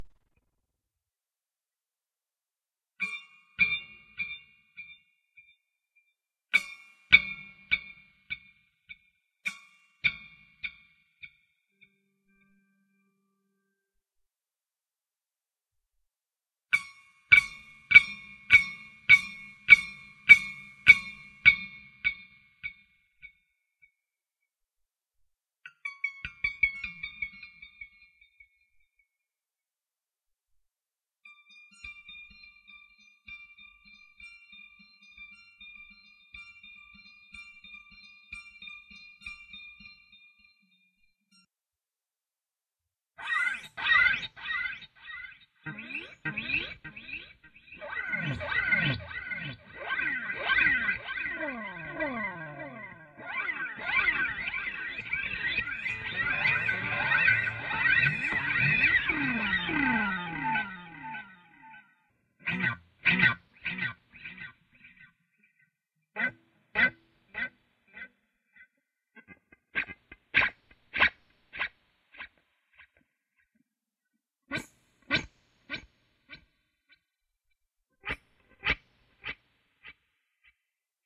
Creepy Guitar-Medium delay
Some spooky sound effects created by using my guitar and a vintage analog delay unit. Medium delay.
delay, guitar